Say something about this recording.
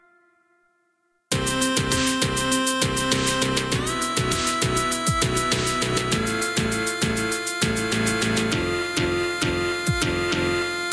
Slow Dubstep Demo [Remix]
bass, dubstep